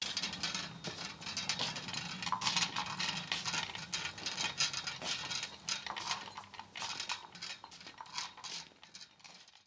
HOSPITAL DRIP TROLLEY
Close perspective, slight dripping and squelching mostly wheels